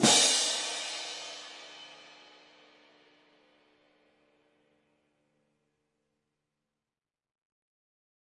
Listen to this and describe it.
Marching Hand Cymbal Pair Volume 23
This sample is part of a multi-velocity pack recording of a pair of marching hand cymbals clashed together.
orchestral, cymbals, percussion, marching, band, symphonic, crash